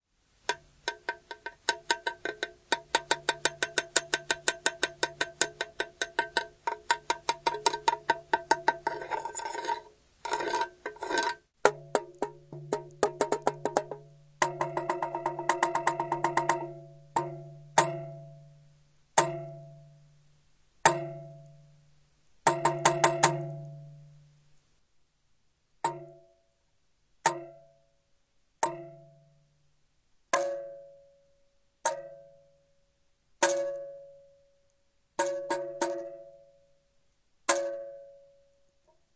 Metal Pipe Contact Mic
Old metal pipe hitting with a small stone and a piece of wood, recorded with a cheap contact mic and Zoom H2.